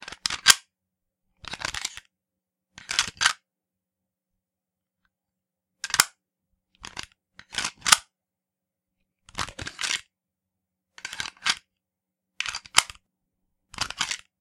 Gun Reloads
Sound of a firearm reloading and being cocked back, made with an adjustable metal pliers.
Equipment used: Audio-Technica ATR2100-USB
Software used: Audacity 2.0.5
Cocking, Firearm, Gun, Loading, Reload, Rifle, Shells, Shotgun, Weapon